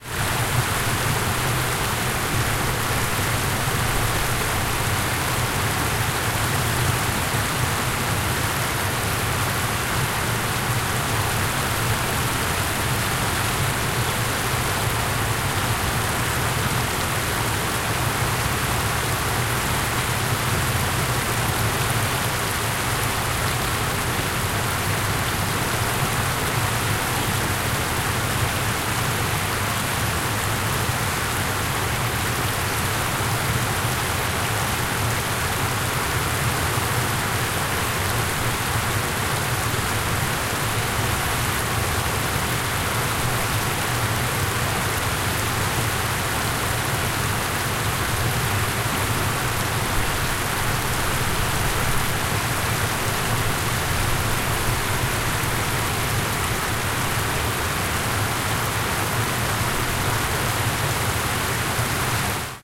0198 Parque del Rodeo waterfall
Fountain in a park, a cascade.
20120324